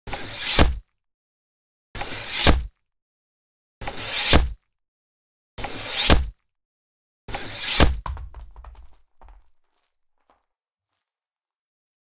Guillotine patch(12Lrs,mltprcssng)
Sound design of the guillotine. Enjoy it. If it does not bother you, share links to your work where this sound was used.